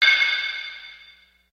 Metal hit
This is sound of something impacting metallic subject. Can use in games and other stuff. Made it in FL studios.